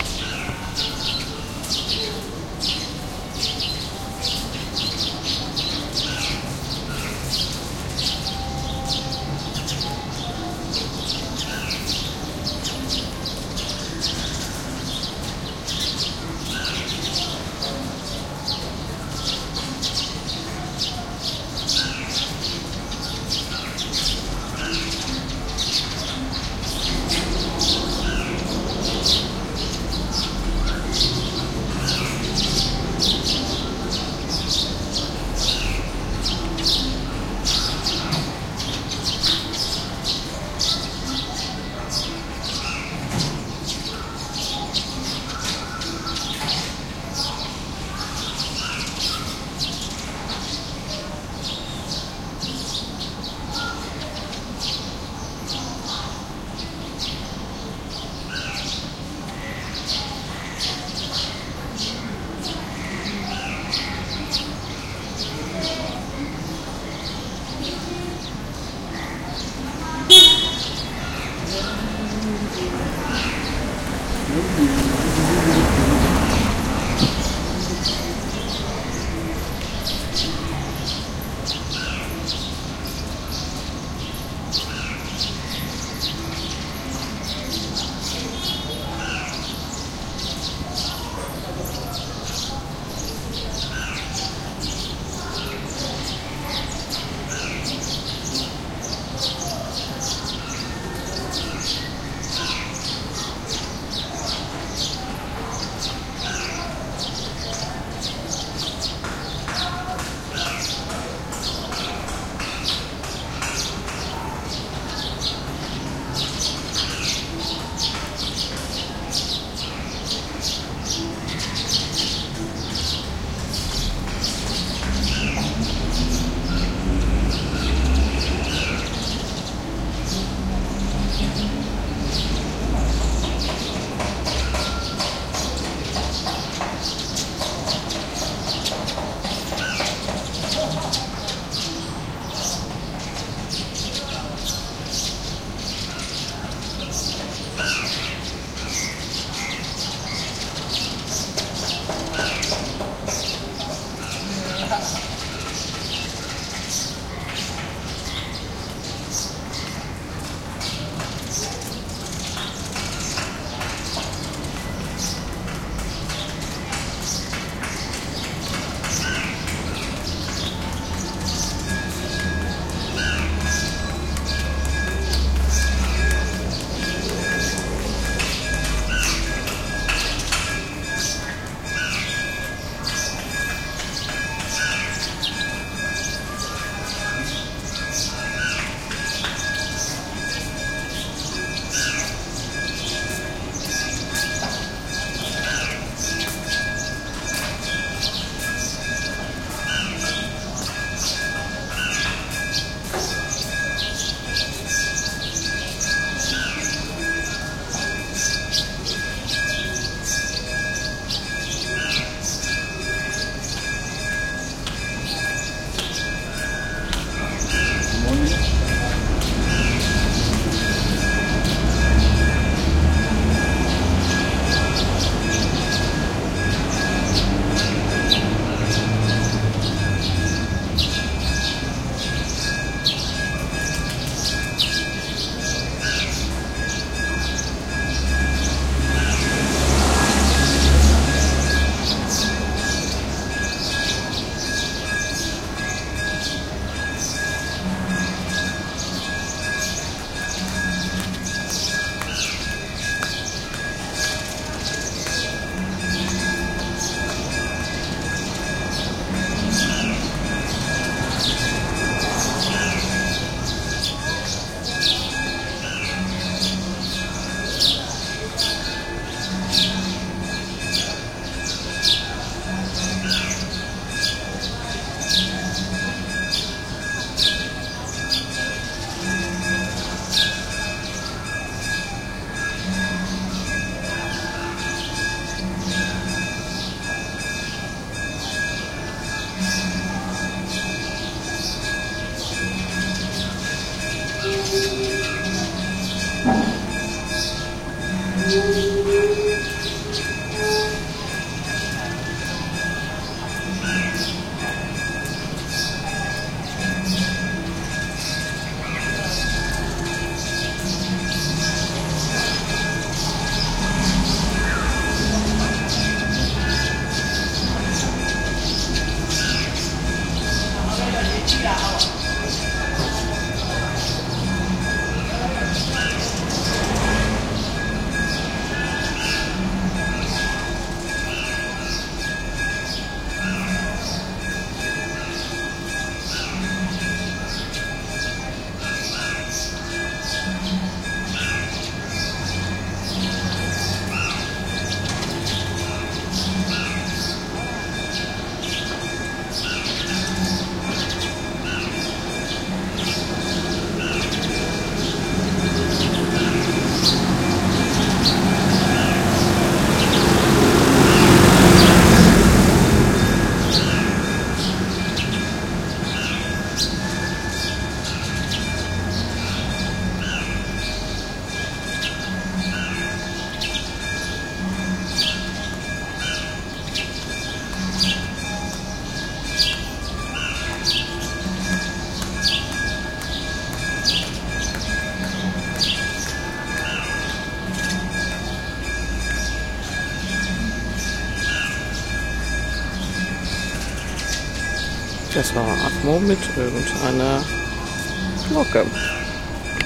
Birds traffic bells Rangoon in the morning
Early morning in Rangoon, Myanmar. Birds sing, people walk down the street, a temple bell sounds.
ambient, birds, city, Rangoon, traffic